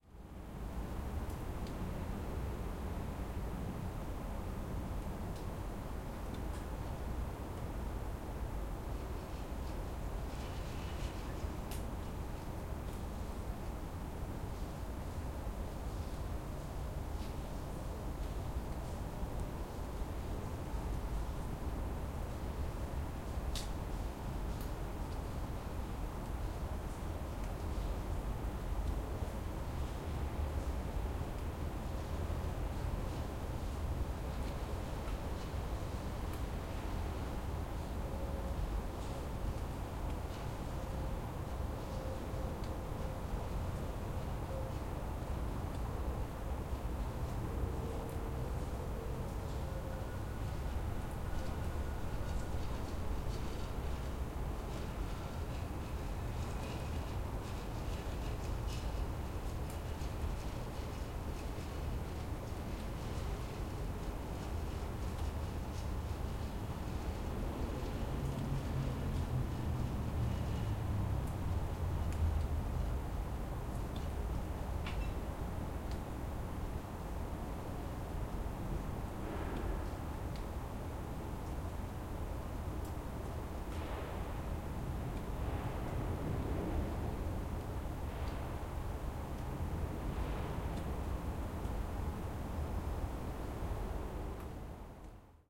City - recorded in a yard midst buildings, distant traffic, occasional water drops on pavement